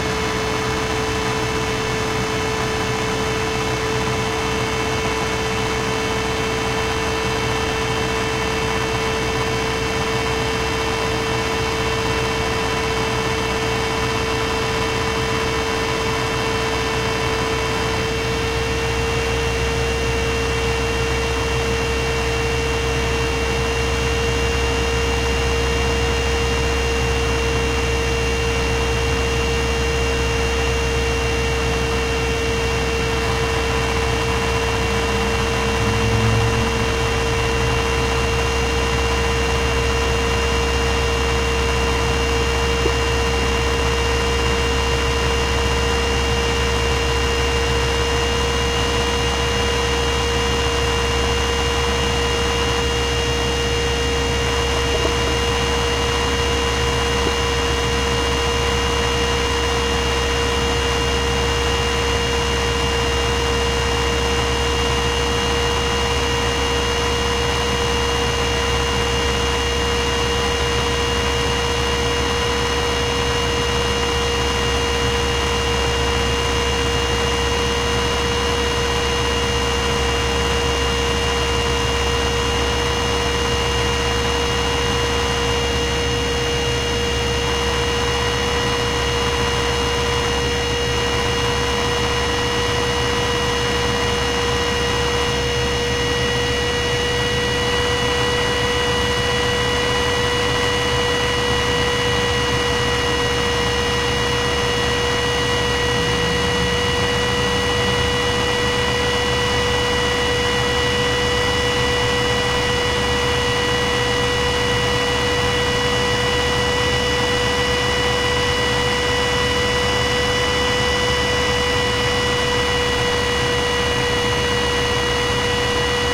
002 - CPU Fan 2.L
This is the noise of my PC AMD FX6300 on hard work load.